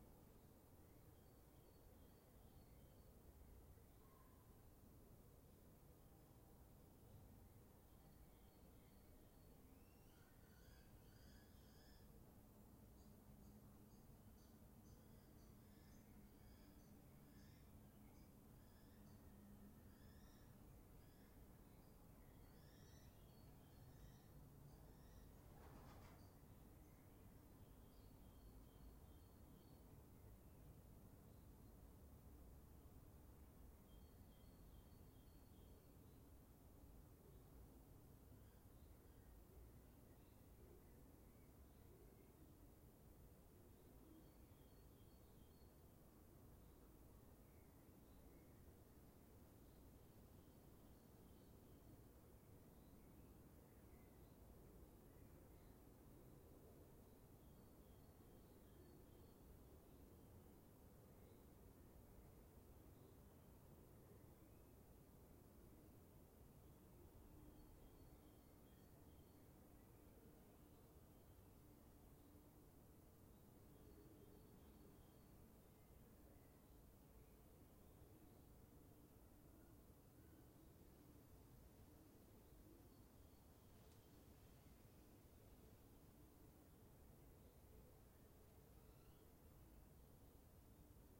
Ambience of a ruined monastery. Lots of bird sounds.